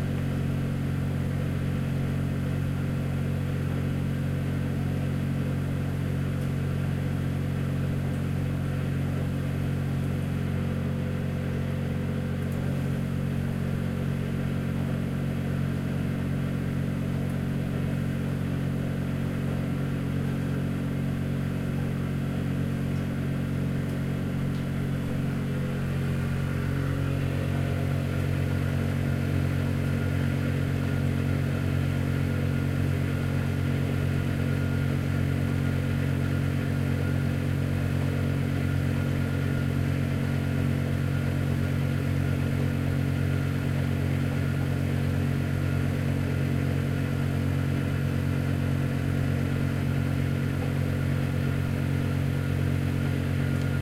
Tumble dryer running in the basement